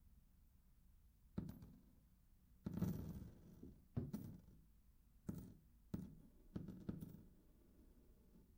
pocket change
coins hitting a table.